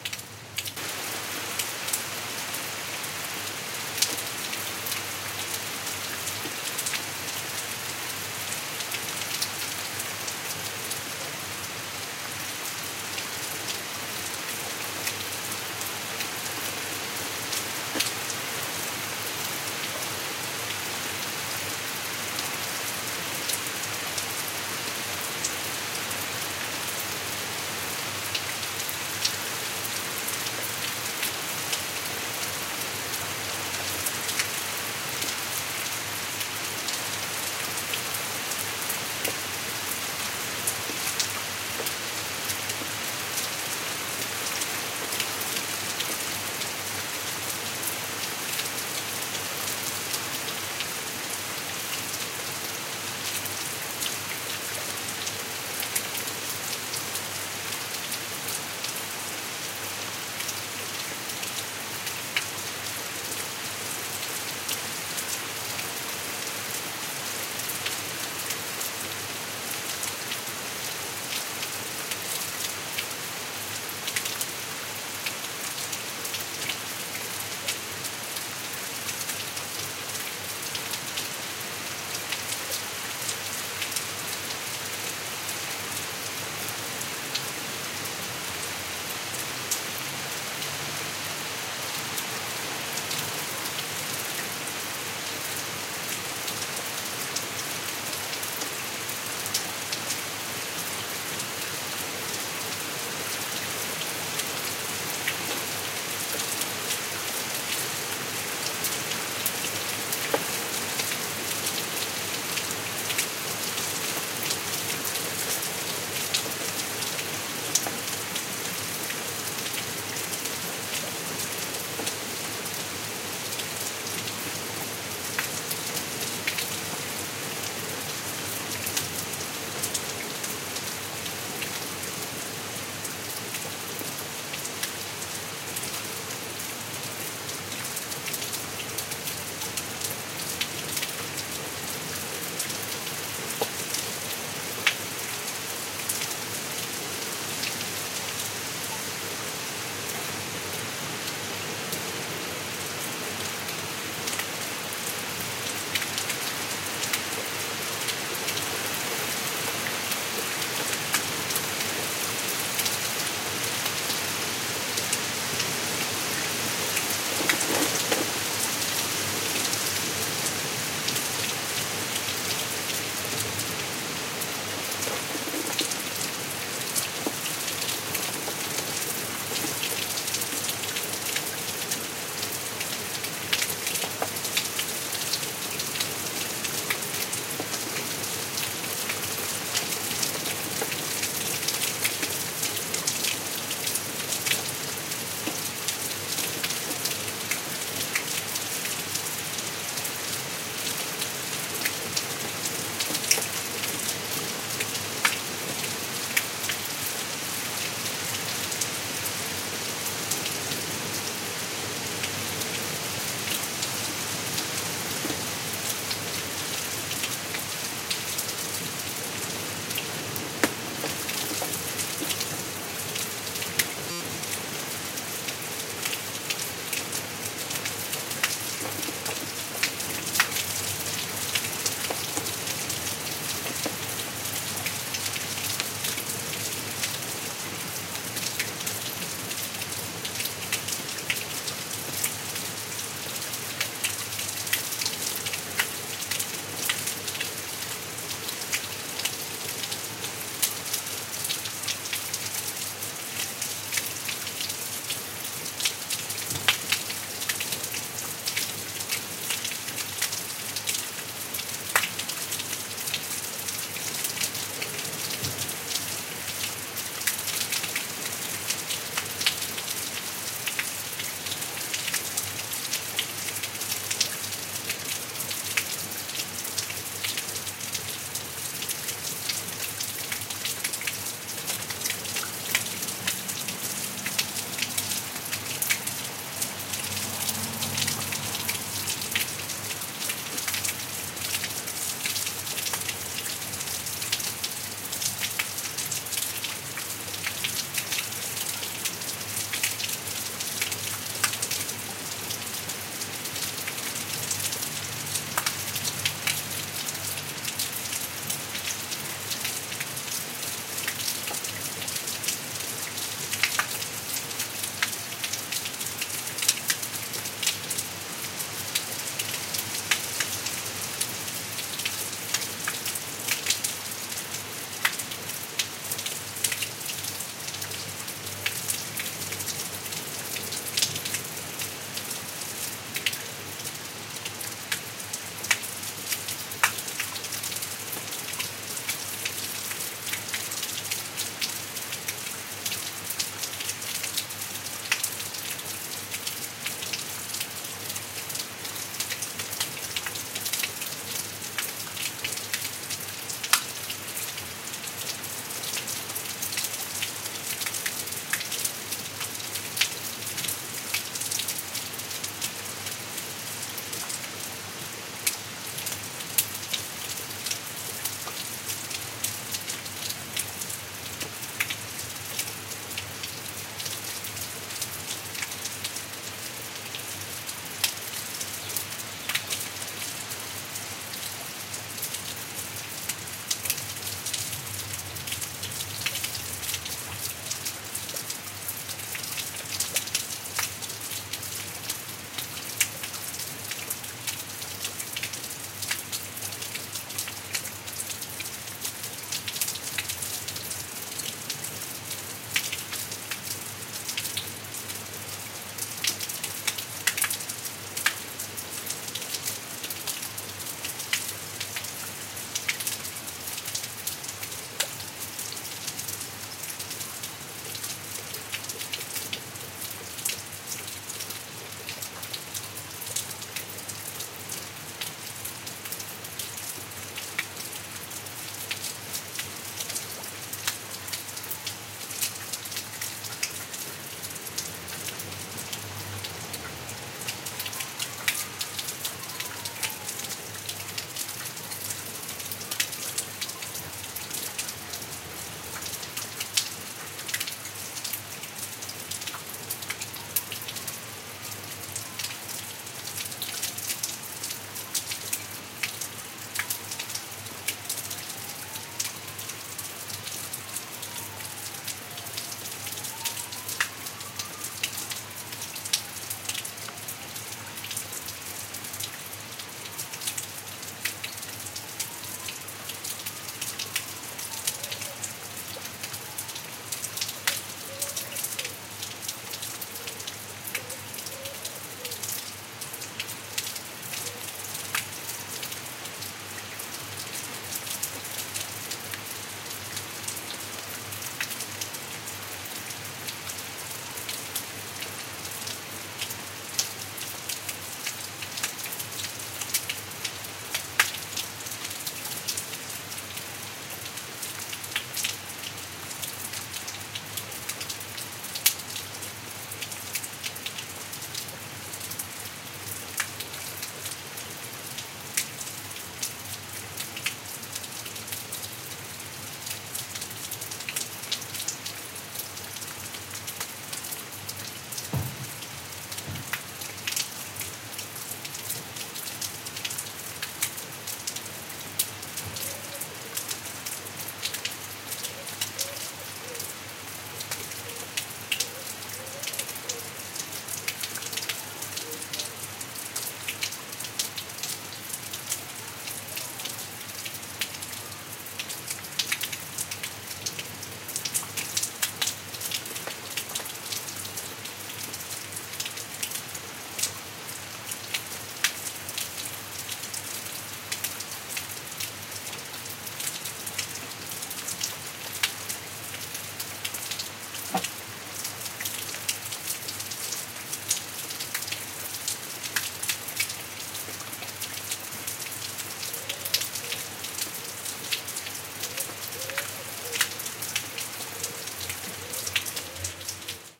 Even more rain!